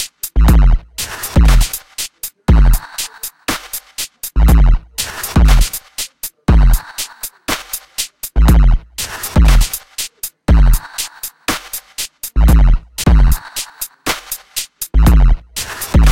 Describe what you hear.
120 BPM Highly Processed Drum Beats